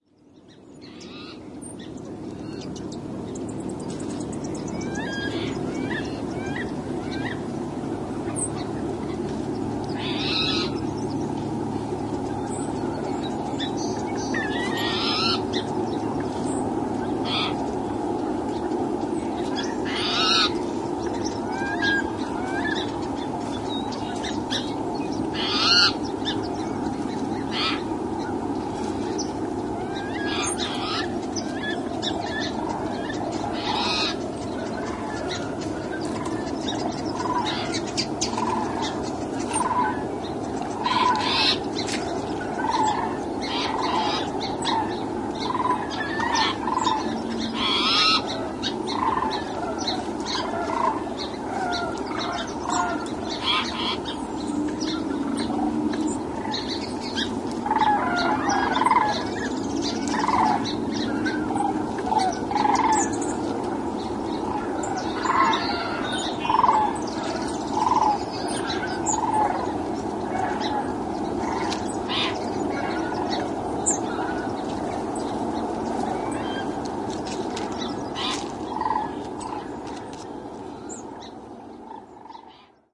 ag23jan2011t13
Recorded January 23rd, 2011, just after sunset.